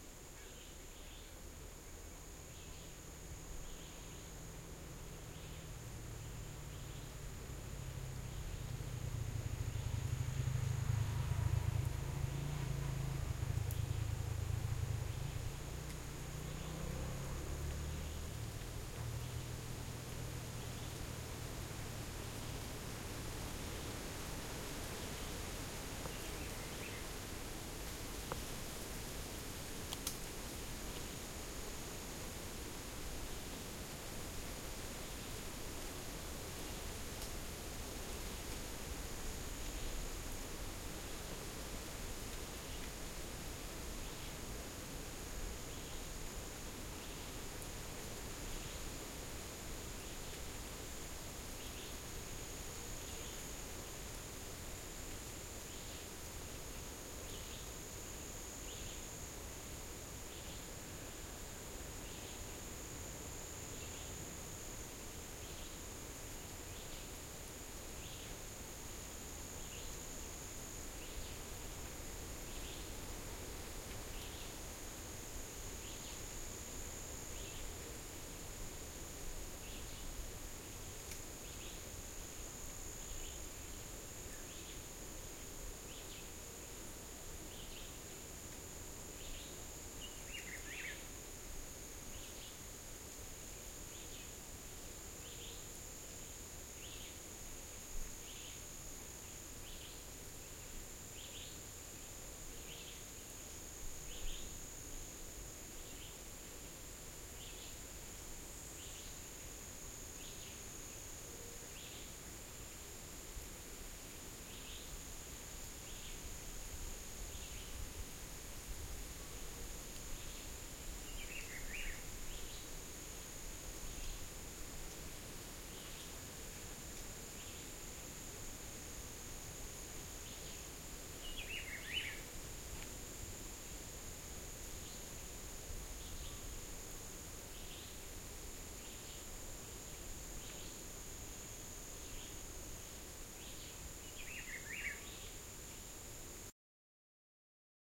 selva costa de aragua, tarde, chicharras, algunos pajaritos, calor
Sound recorded in the "Henry Pittier National Park" located central coast of Venezuela with the edirol r44 stereo internal mics and one very old me66. Hope you like it!
background, birds, tropical, field-recording, insects, forest, natural